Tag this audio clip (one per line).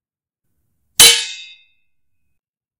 clang
clash
collide
collision
hit
impact
knife
metal
metallic
strike
sword
swords
ting